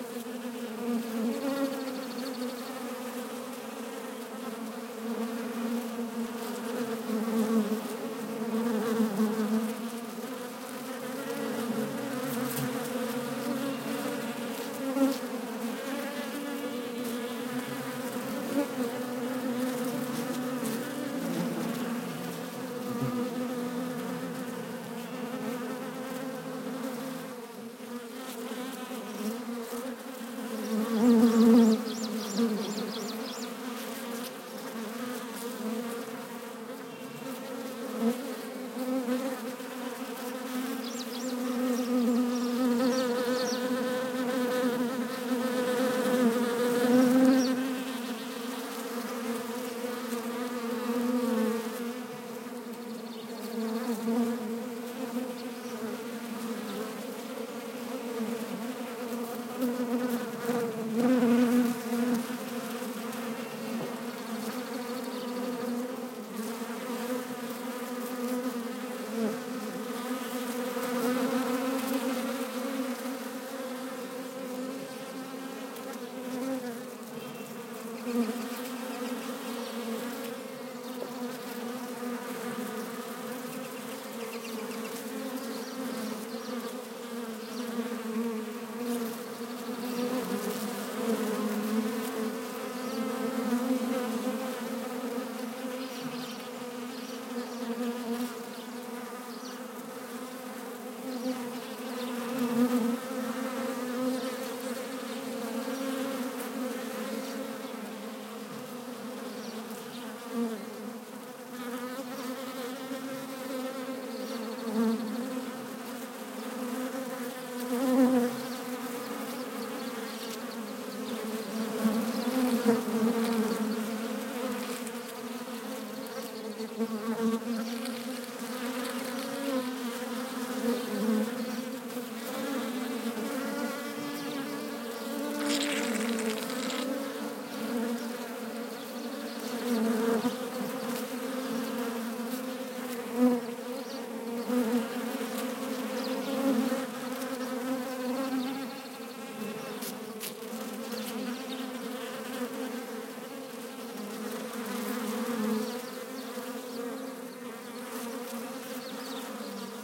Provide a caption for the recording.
A swarm of wasps recorded in my backyard with a Sony PCM D100.
Insect, Fly, Swarm, Wasp, Bee, Buzz